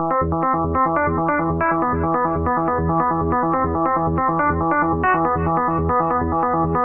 Melody sequence im working on. Not sure why but it literally took me HOURS of tweaking the parameters of the synth to get the sound right. Im meticulous about my sounds but this was ridiculous. enjoy